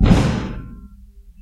Bonks, bashes and scrapes recorded in a hospital.
hospital, percussion, hit